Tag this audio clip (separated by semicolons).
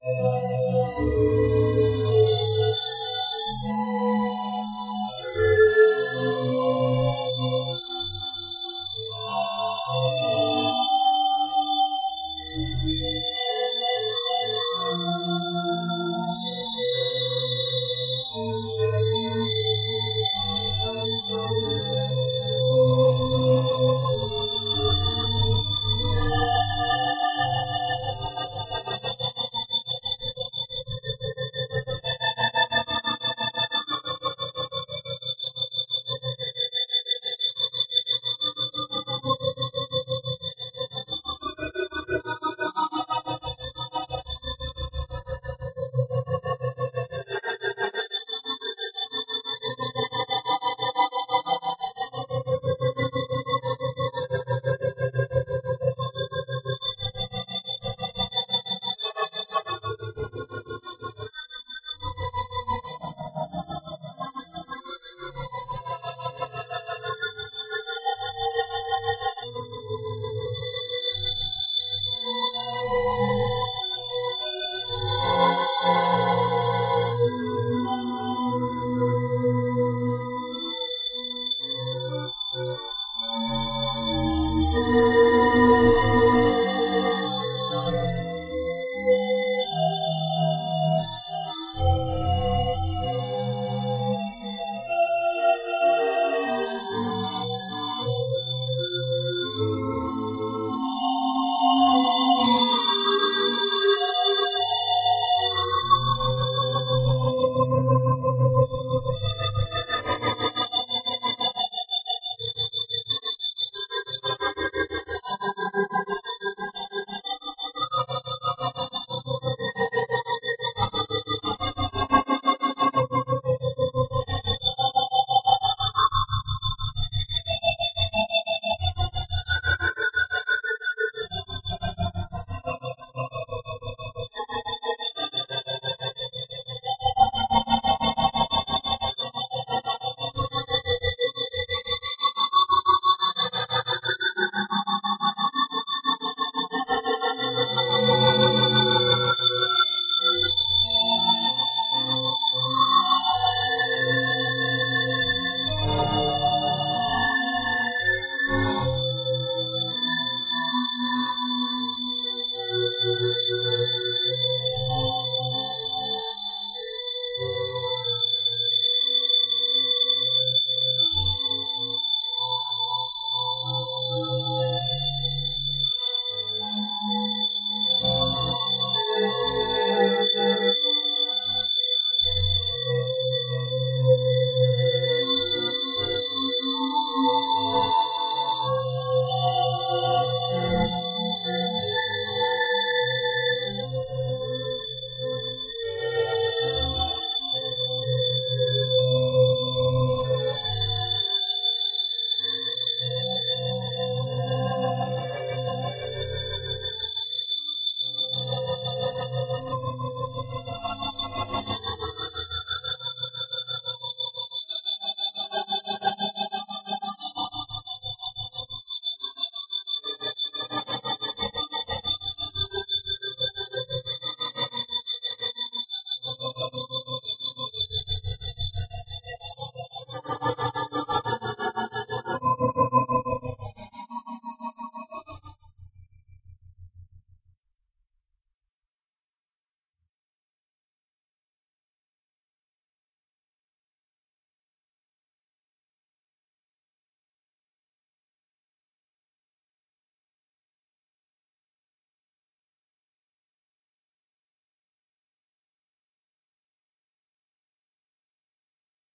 remix; dare; continuum; klankbeeld